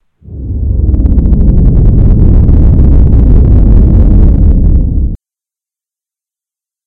Cinematic Rumble
This is a sound I made by placing a low sound effect on LMMS continually with another note lower right under it. Then I edited it in Audacity with a bassboost, amplify, and fade out.
Movies, Low